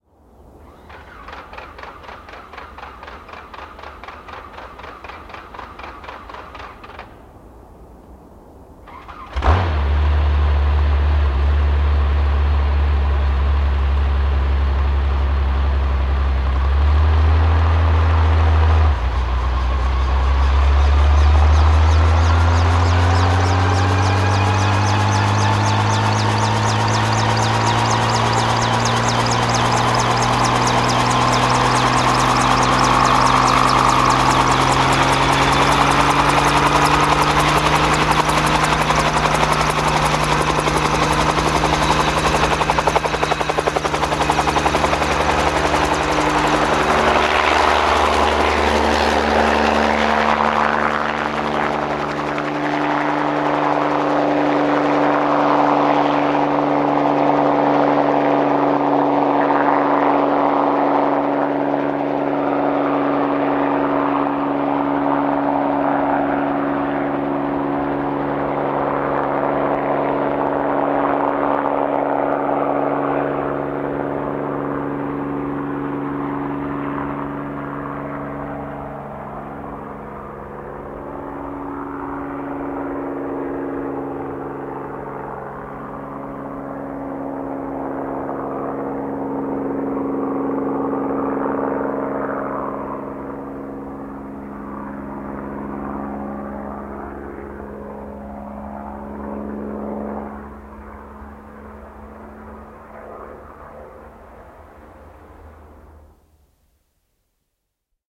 Helikopteri, nousu, lähtee ja etääntyy / A helicopter taking off, receding, rotor, propeller blades, Bell 47G2 OH-MIG
Bell 47G2 OH-MIG. Käynnistys, lisää kierroksia, nousu, etääntyy. Roottorin lavat viuhuvat.
Paikka/Place: Suomi / Finland / Helsinki, Malmi
Aika/Date: 23.03.1972
Finland, Suomi, Soundfx, Roottori, Finnish-Broadcasting-Company, Yle, Tehosteet